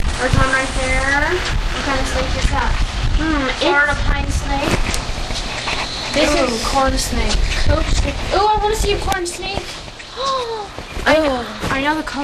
Noisy snake sounds recorded at Busch Wildlife Sanctuary with Olympus DS-40.